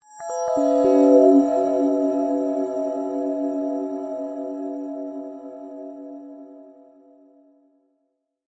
Start Sounds 014
Start Sounds | Free Sound Effects